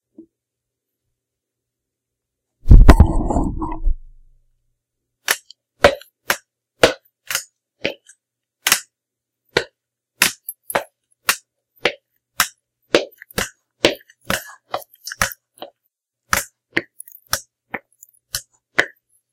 fast walking with crutches on tile
walking quickly with a set of crutches on a tile floor